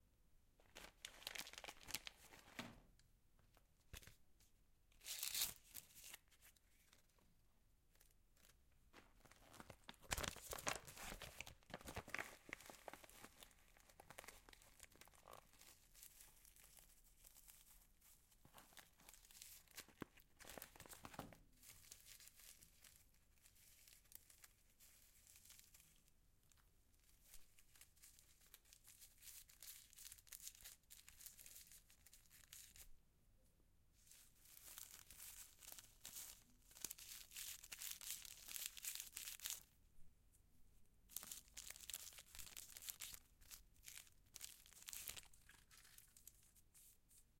roll-a-cigarette-variations-licking
A cigarette is turned. Filters, paper and tobacco. Finally licked. (ZOOM H6)
tobacco, rolling, smoking